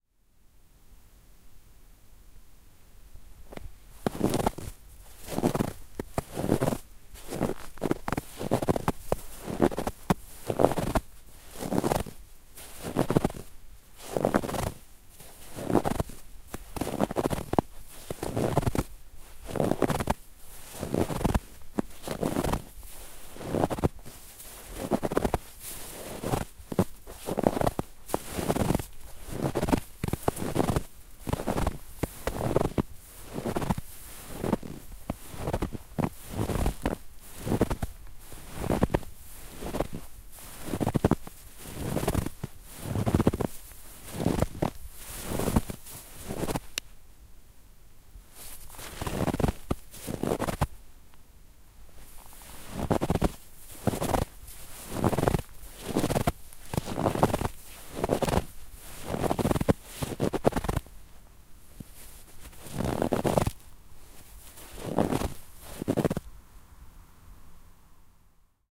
Close-micd Snow Steps
Don't often get snow here in the UK so thought I'd grab the opportunity. My ankle bone cracks at -0'21"!
crunch, Field-recording, snow, snow-crunch, steps, walking-through-snow